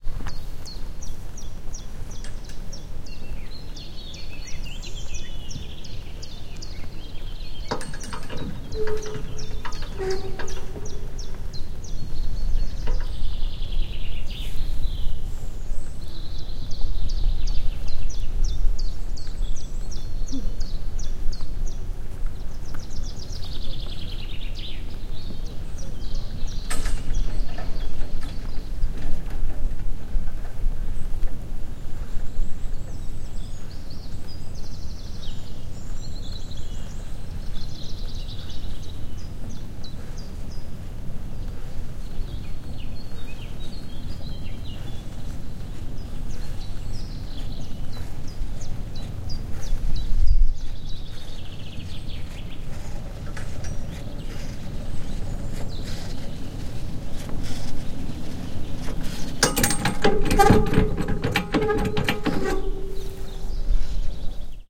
Recorded with Sony PCM-D50 in June 2014 on the cableway in the Carpathians, Ukraine.